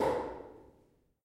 One of a series of sounds recorded in the observatory on the isle of Erraid
hit, resonant, field-recording